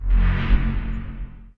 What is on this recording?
Sick atmos efx
Huge bass atmosphere effect
Bass, fx